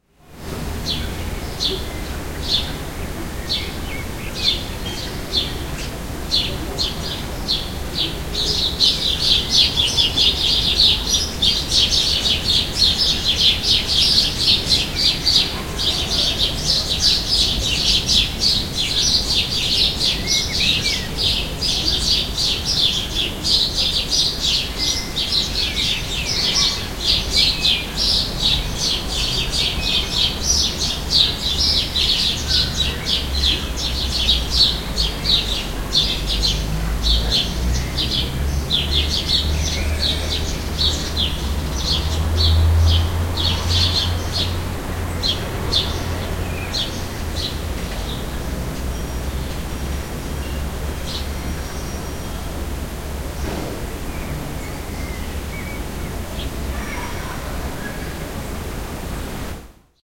A few sparrows are having an argument on my balcony. A blackbird doesn't want to get involved. Urban noise like a car and a streetcar departing from it's staring point in the background. It's windy. Recorded with an Ediirol cs-15 mic plugged into an Edirol R09 around five in the afternoon the sixteenth of June 2007 in Amsterdam.